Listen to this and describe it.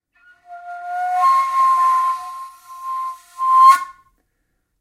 Kaval Play 06
Recording of an improvised play with Macedonian Kaval